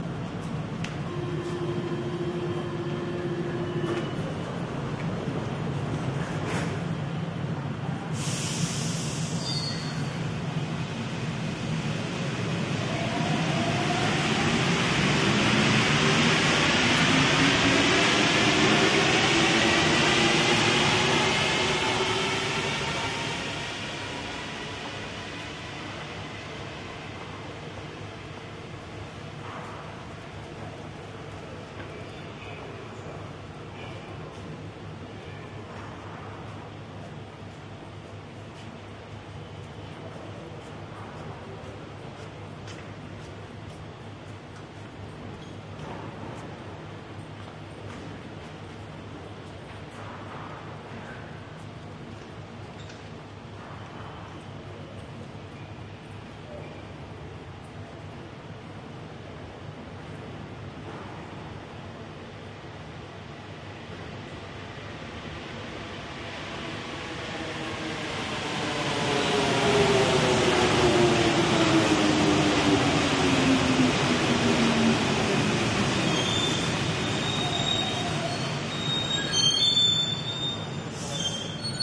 016-Parisian metro station-metro stoping and going 4
Line 1, 2012, Blumlein stereo recording (MKH 30)
go, stop